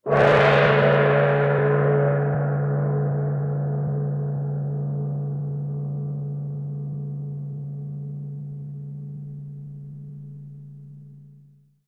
Asian Gong
Recorded this in my school band room
China, Korea, Instrument, India, Drums, drum, Thailand, Asia, Japan